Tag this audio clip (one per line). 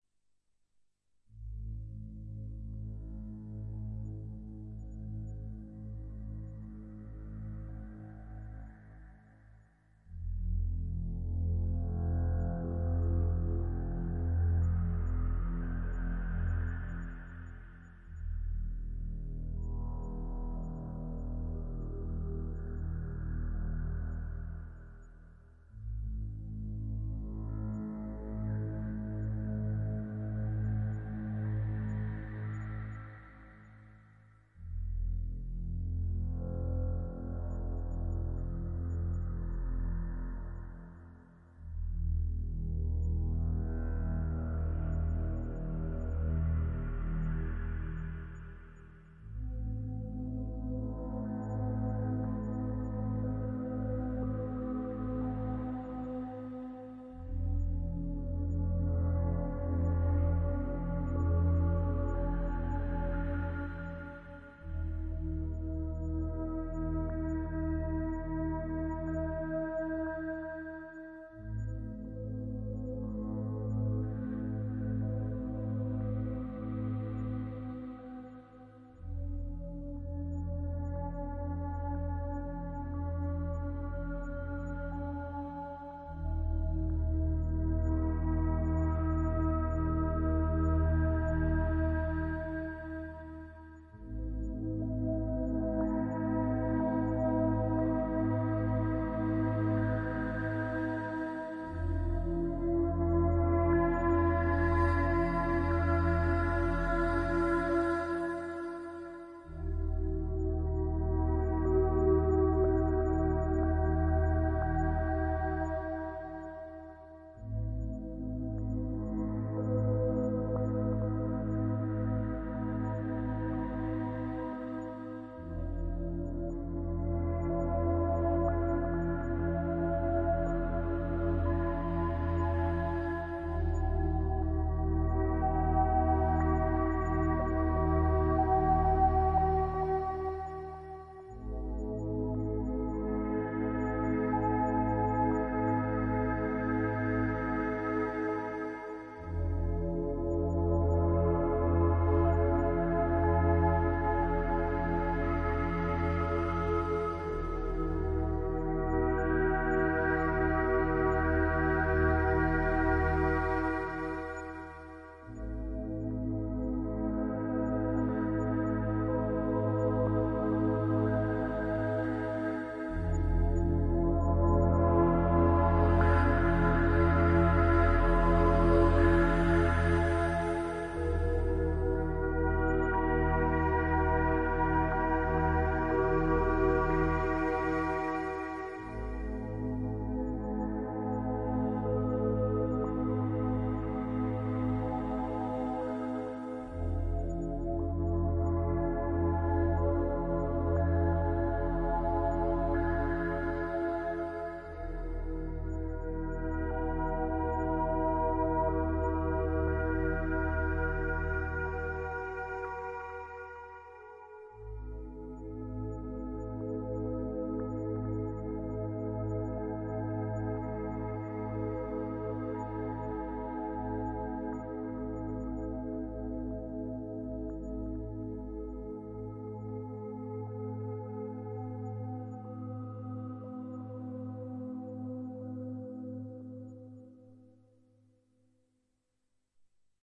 meditation
meditative
relaxation
relaxing